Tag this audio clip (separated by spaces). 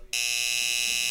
bell doorbell timbre